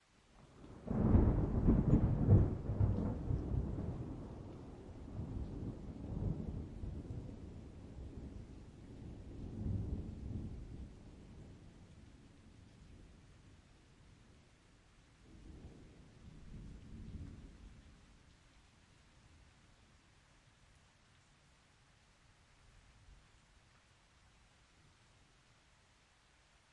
thunder clap, light rain
no processing
zoom h6, xy capsule
clap, rain